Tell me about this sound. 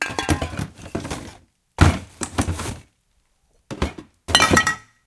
Rummaging in closet with clatter